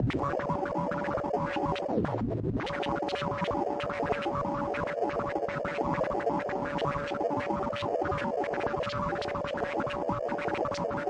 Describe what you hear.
sound of my yamaha CS40M